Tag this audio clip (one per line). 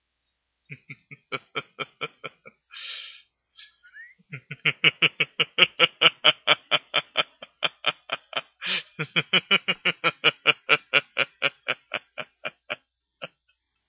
laughing
man
voice